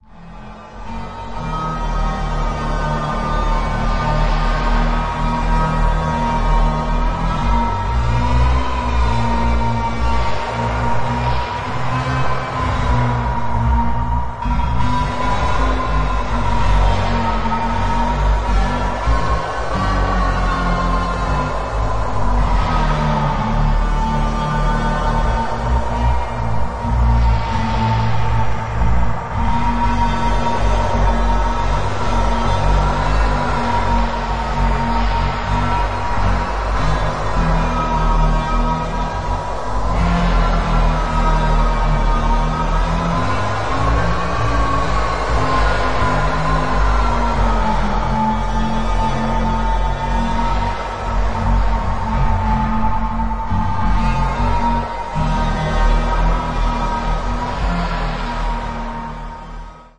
original sound is taken from a well known analog synth and is heavily processed with granular-fx, bit-reduction, reverb, filtering, pitch-shifting and other effects...
fx, granular, scary, soundscape